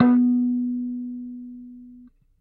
Tones from a small electric kalimba (thumb-piano) played with healthy distortion through a miniature amplifier.
amp, bleep, blip, bloop, electric, kalimba, mbira, piezo, thumb-piano, tines